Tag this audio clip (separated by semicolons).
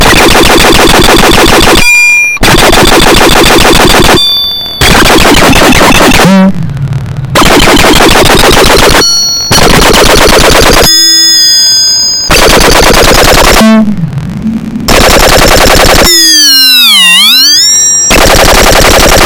core; just-plain-mental; glitch; rythmic-distortion; murderbreak; bending; coleco; experimental; circuit-bent